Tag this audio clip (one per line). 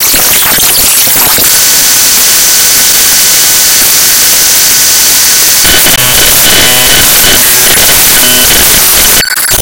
damage
destruction
digital
error
file
glitch
hard
harsh
metalic
noise
noise-modulation